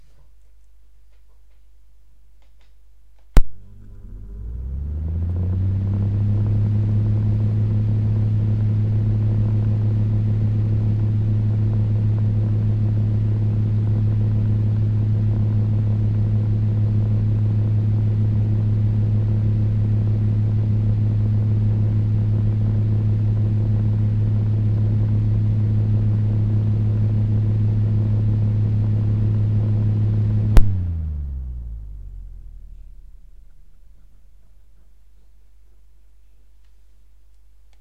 The sound of an office fan starting up and shutting down after 30 seconds
machine, Ignition, starting, Power, engine, Operation, fan, down, Hum, compressor, mechanical, machinery, up, motor, shutting, office, generator, Sounds, start